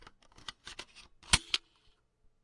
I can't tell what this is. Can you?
Floppy disk drive - insert
Inserting a diskette into a floppy disk drive. Recorded with a Zoom H1.
diskette, disk, computer, fdd, drive, insert, hard-drive, pc, floppy